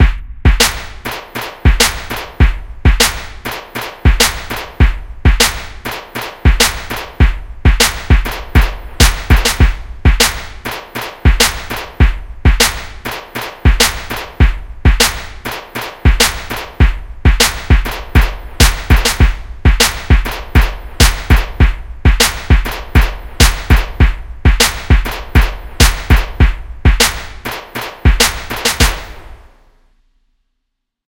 The Aggressives Loop 1 Bpm 100 - Nova Sound
1, 100, Aggressive, Aggressives, Bpm, Drum, Loop, Nova, Sound, The